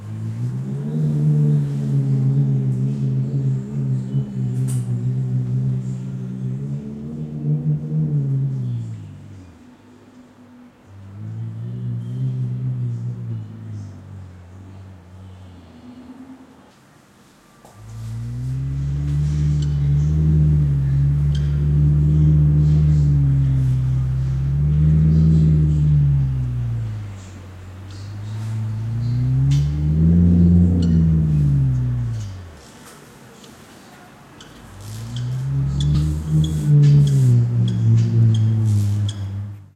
20190323.howling.wind.014
Wind howling furiously, with some bird callings and traffic noise in background.
EM172 Matched Stereo Pair (Clippy XLR, by FEL Communications Ltd) into Sound Devices Mixpre-3 with autolimiters off
weather, field-recording, windy, gusts, howling, wind